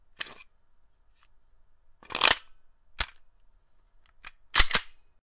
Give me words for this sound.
Glock 17 Reload #2
A reload of the Glock 17. recorded with a non-filtered condenser mic.